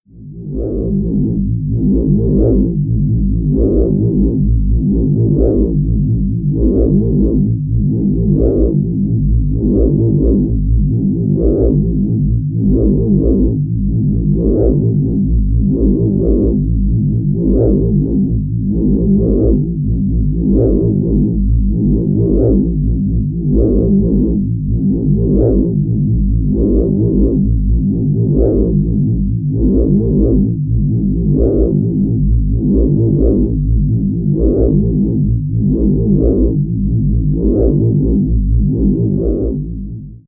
Machinery BK
Various artificially created machine or machinery sounds.
Made on Knoppix Linux with amSynth, Sine generator, Ladspa and LV2 filters. A Virtual keyboard also used for achieving different tones.